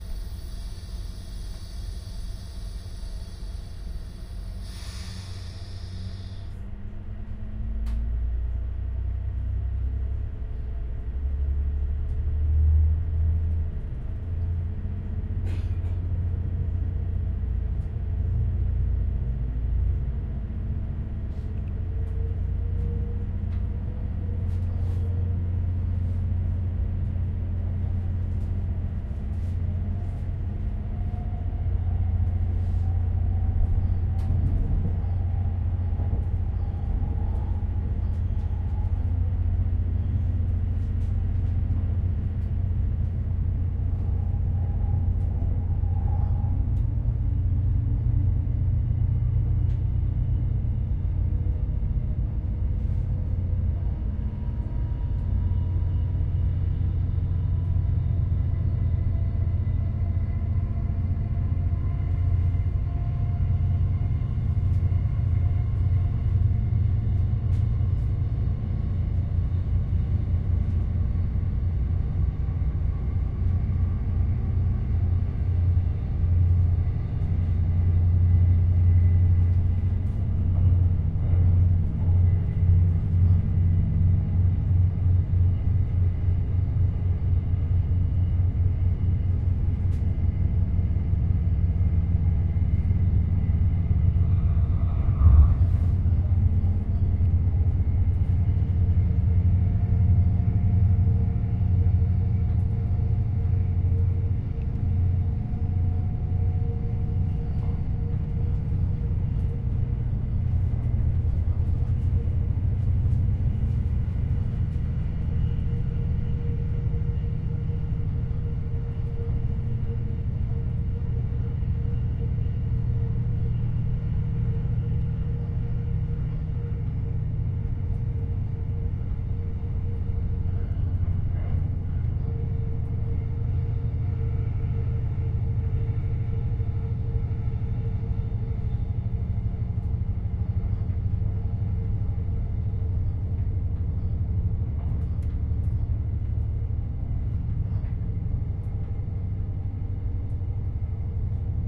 Inside diesel train start and cruise
Fieldrecording inside a dutch diesel train from start til cruise.
Sounds of railway and diesel engine.
Diesel, field-recording, Railway, Train